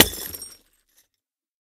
LIGHTBULB SMASH 002
This was the smashing of an indoor flood light bulb. Lights smashed by Lloyd Jackson, recorded by Brady Leduc at Pulsworks Audio Arts. Recorded with an ATM250 mic through an NPNG preamp and an Amek Einstein console into pro tools.